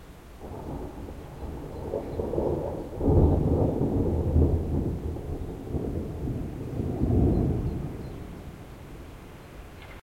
One of the thunderclaps during a thunderstorm that passed Amsterdam in the morning of the 10Th of July 2007. Recorded with an Edirol-cs15 mic. on my balcony plugged into an Edirol R09.